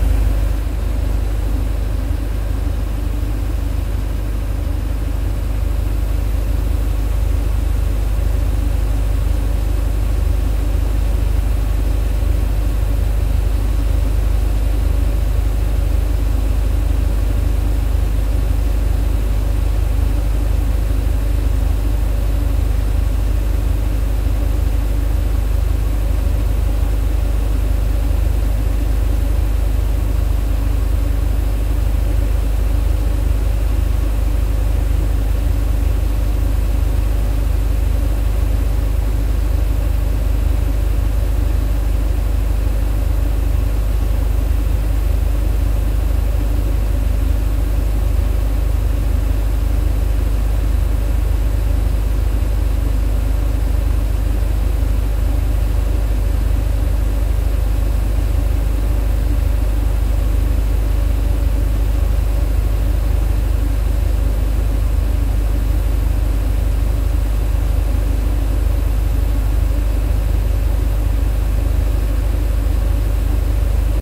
Compressor Industrial Construction Atlas Copco XAVS 186 no Low Cut close steady
Compressor Industrial Construction Atlas Copco XAVS 186 no Low Cut close , 20cm to Exhaust , steady.
Mic Sennheiser MKH 8060 , Sound Devices 633 , no Low Cut. Rumbling and hissing. Possibly also for creating Atmospheres like machine rooms.
Construction, Machine, Industrial